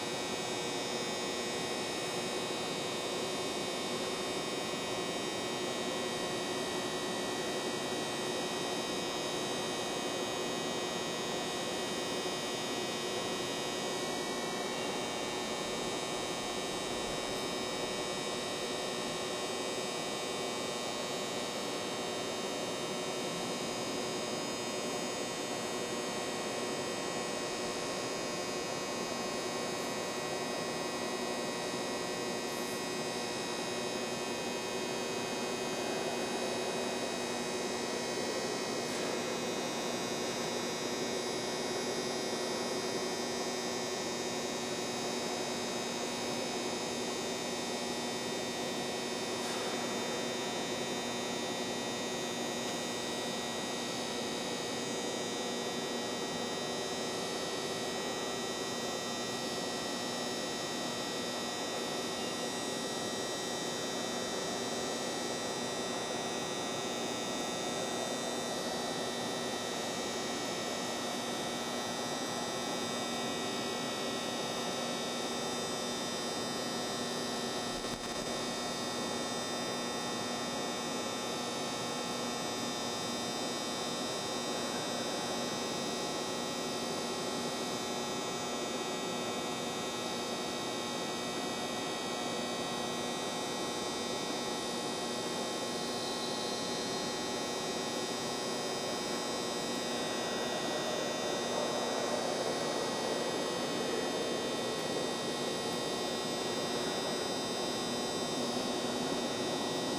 neon fluorescent store sign loud buzz close +heavy city tone
buzz, close, fluorescent, loud, neon, sign, store